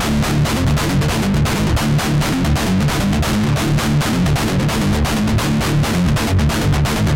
REV GUITAR LOOPS 9 BPM 133.962814
DUSTBOWLMETALSHOW, 13THFLOORENTERTAINMENT, GUITAR-LOOPS, 2INTHECHEST, HEAVYMETALTELEVISION